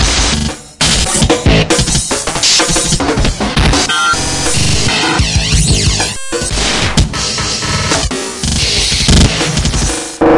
BR sequenced [guitar] (3)
One of the sequenced sections of percussion, unaccompanied
beats, fast, breakcore